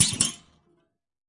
BS Hit 22
metallic effects using a bench vise fixed sawblade and some tools to hit, bend, manipulate.
Hit, Sound, Clunk, Thud, Dash, Bounce, Metal, Hits, Sawblade, Effect